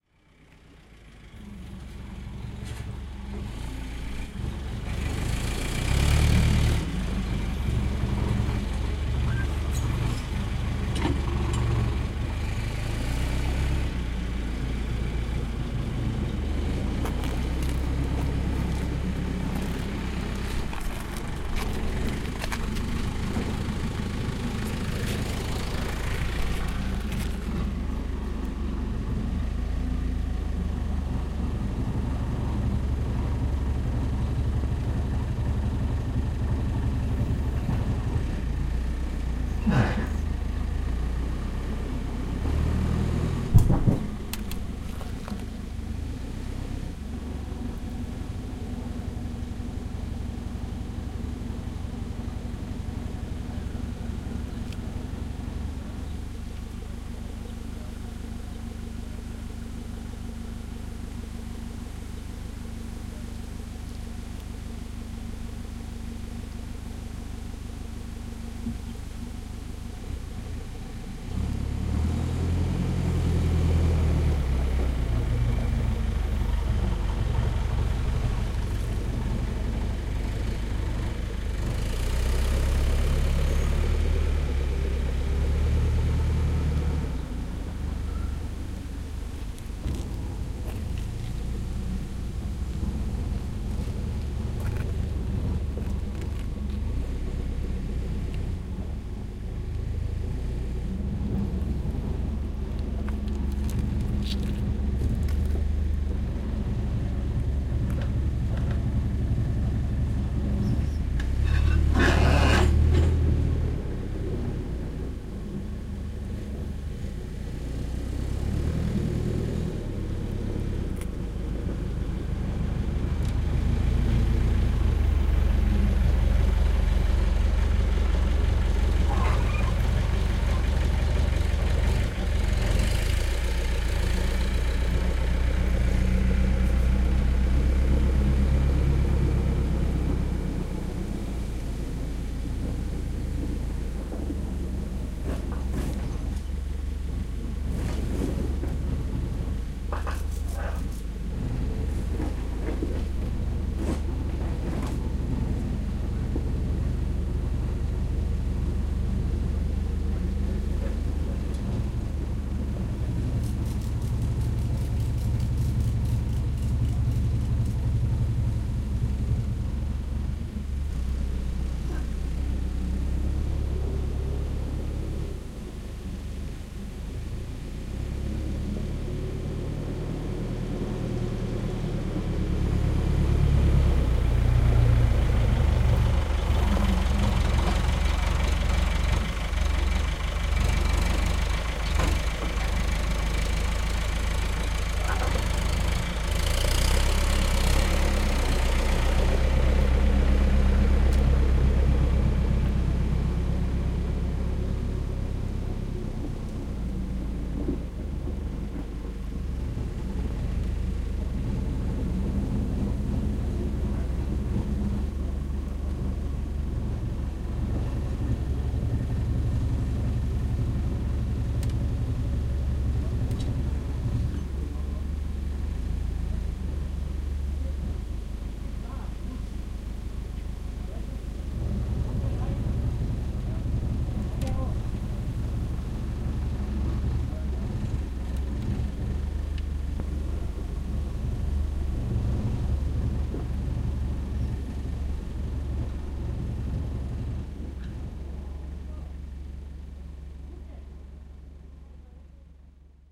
110803-load in lillevorde1
03.08.2011: fourth day of the research project about truck drivers culture. Lillevorde in Denmark. In the field. Sound of loading truck with blackcurrant.
denmark, field, field-recording, forklift, lillevorde, load, loading, noise, tractor, truck